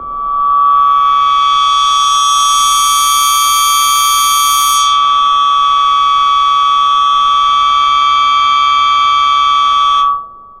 Arp 2600 high freq pad